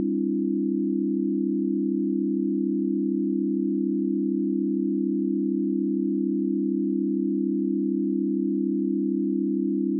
base+0o--4-chord--26--CFGA--100-100-100-100
test signal chord pythagorean ratio
pythagorean, ratio, chord, test, signal